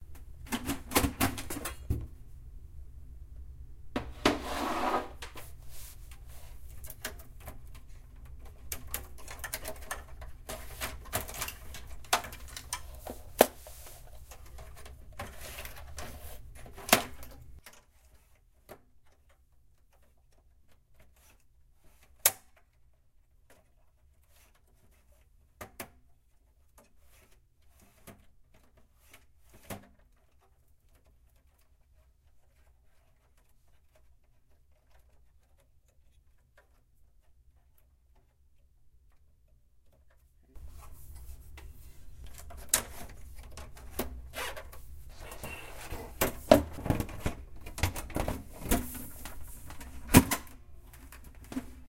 swopping hard drives in a dell OptiPlex 755. I have them mounted such that I simply need remove the one I want and swop it with the main one.